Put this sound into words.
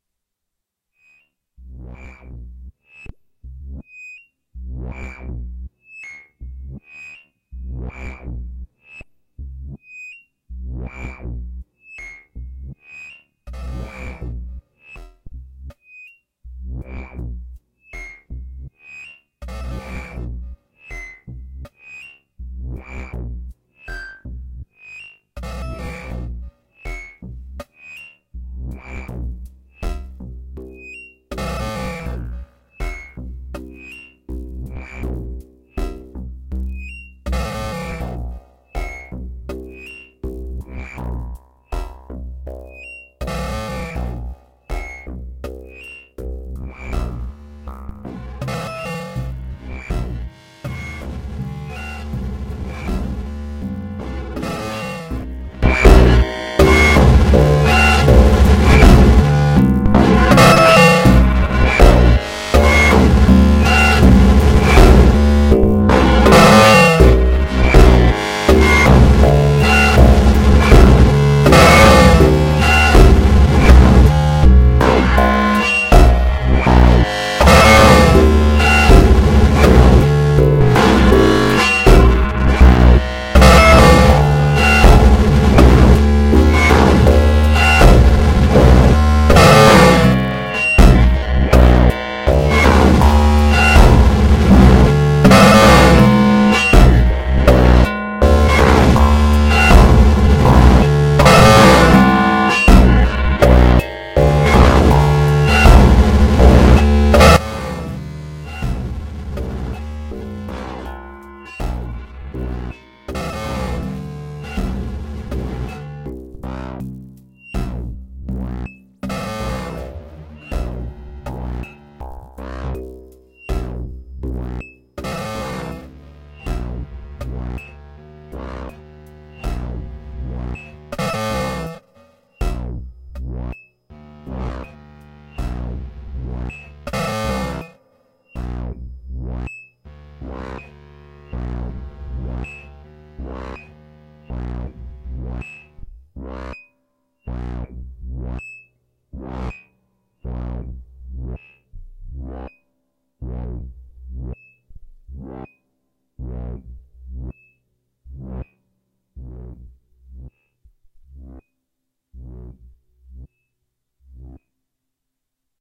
Patch on my Doepfer A-100 modular synthesizer.
Cut and normalized using ocenaudio.
It's always nice to hear what projects you use these sounds for.
You can also check out my pond5 profile. Perhaps you find something you like there.